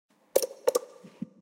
Elevator Button 2
Button, Elevator, click, push, pushing, switch